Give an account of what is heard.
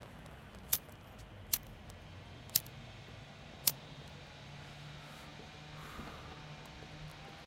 20141126 lighter H2nextXY
Sound Description: Feuerzeug klicken
Recording Device: Zoom H2next with xy-capsule
Location: Universität zu Köln, Humanwissenschaftliche Fakultät, HF 216 (EG)
Lat: 6.92
Lon: 50.933889
Date Recorded: 2014-11-26
Recorded by: Saskia Kempf and edited by: Tim Meyer
Cologne, Field-Recording, tools, University